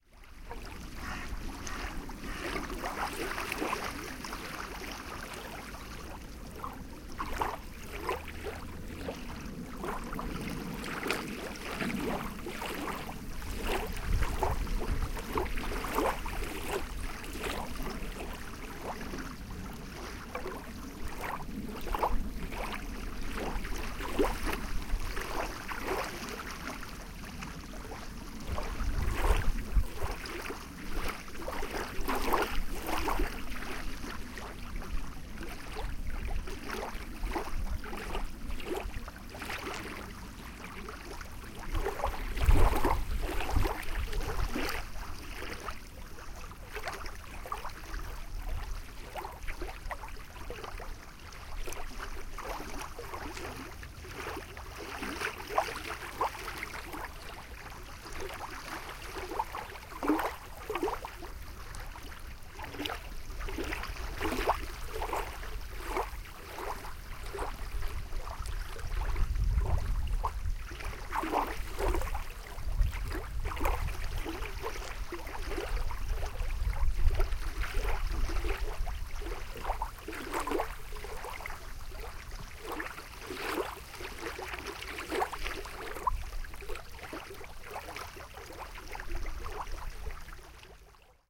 lake boadella waves
Binaural field-recording of the small waves created at the Boadella artifical lake in Catalonia.
okm-II, waves, water, lake, field-recording, soundman, h1, nature, binaural, zoom, boadella, ambiance